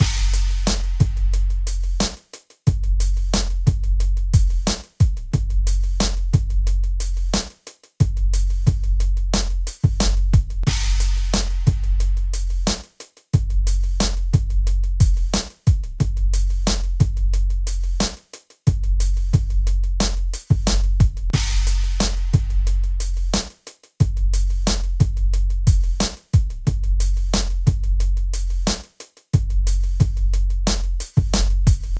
HH loop 90bpm
hip hop drum loop by Voodoom Prod created with Logic Pro
hiphop, beat, loop